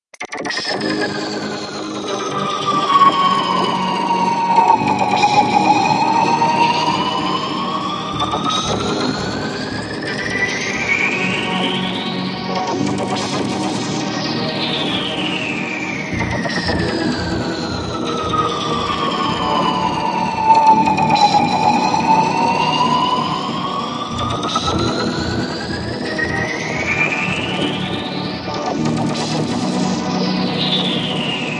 I was testing some VSTis and VSTs and recorded these samples. Some Ausition magic added.
Synth passed to heavy flanger.
Loopable for 120 bpm if you know what to do (I do not).
120-bpm, echoes, enigmatic, flanger, soundscape